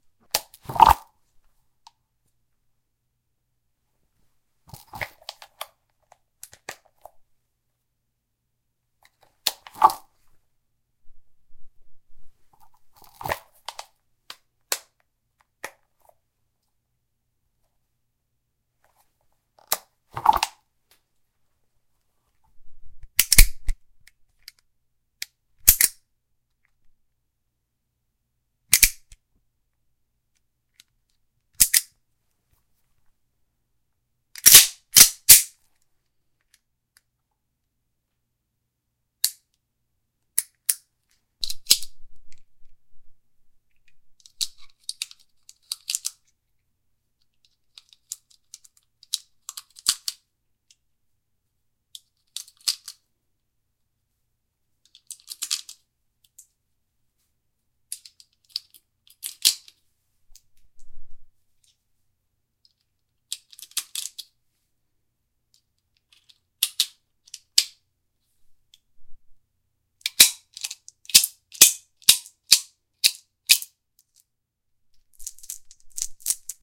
Various S&W Model 39 handling sounds
Various handgun handling sounds recorded with a TASCAM DR-07 MkII, inside of a small bathroom. You can hear the natural reverb.
An out of town friend came in for a business meeting, brought his S&W; Model 39 handgun. As you can tell, I can't answer further questions about it since it's not mine. I grabbed some quick sounds of it though
I can guess some sounds though
0:00 - Holster sounds, drawing, and holstering
0:21 - Hammer cocking? Decocking
0:34 - Pulling the slide?
0:39 - Safety?
0:41 - Inserting ammo into the magazine
1:09 - Removing ammo from magazine, followed by ammo jingle
39, Smith, Smith-and-Wesson